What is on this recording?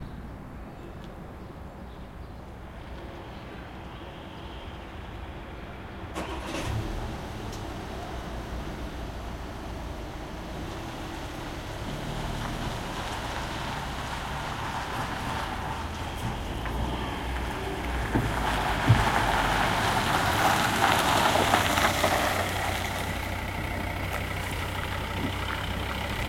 car arrives and another exits
car arrives, another exits. recorded with zoom h2n and slightly edited with audacity. location: Finland- riihimaki date: may 2015
arrives, car, exits, field-recording, location-Finland